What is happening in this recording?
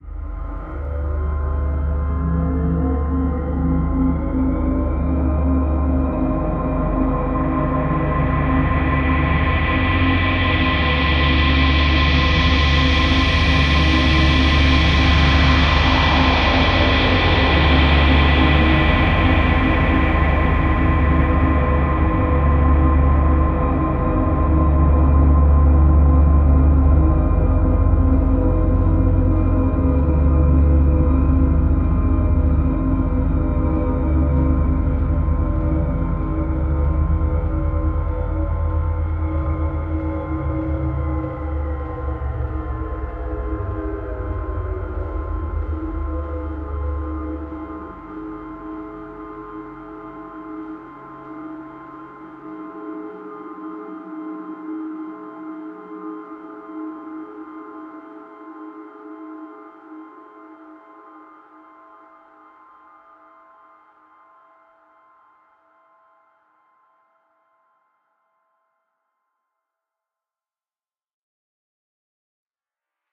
Space ambience: space hanger, space-airport atmosphere, sci-fi, alien sounds. Hard impact sounds, jet takeoff. Recorded and mastered through audio software, no factory samples. Made as an experiment into sound design, here is the result. Recorded in Ireland.
Made by Michaelsoundfx.